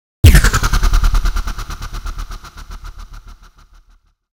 A synthesized high tech warp drive sound to be used in sci-fi games. Useful for when a spaceship is initiating faster than light travel.
sci-fi, warp, warp-drive, hyperdrive, futuristic, sfx, gaming, game, videogames, ftl, gamedeveloping, video-game, indiedev, gamedev, spaceship, indiegamedev, warpdrive, high-tech, games, science-fiction